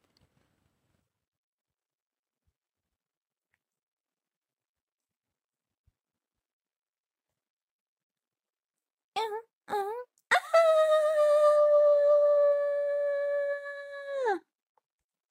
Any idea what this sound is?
Grito pupi
scream, impact, gilr